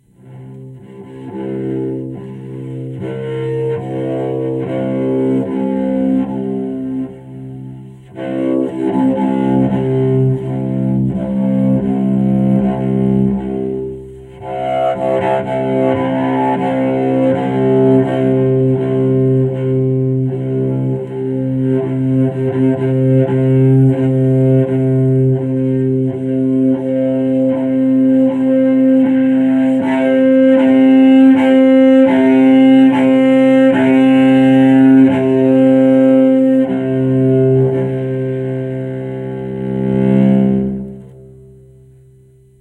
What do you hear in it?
Cello Play C - 03
Recording of a Cello improvising with the note C
Acoustic, Instruments